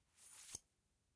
Unsheathing a knife
Pulling a dagger from its sheath.